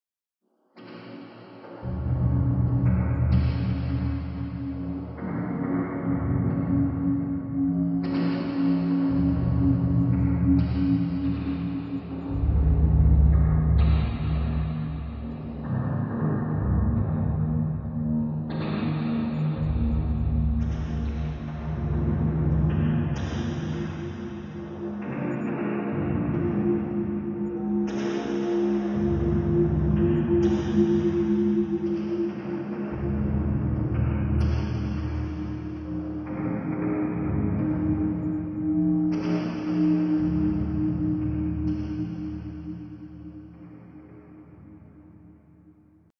spectral city
I tried out the new software from Izotopes called Iris and made this noisy blur of sounds.